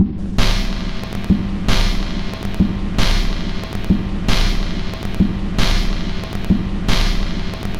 A simple lofi dark-ambient loop.
ambient, lofi, loop